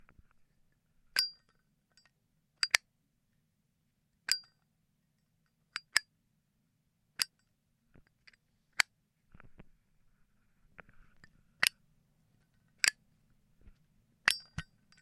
Playing around with a zippo lighter case
Sound-effects, Zippo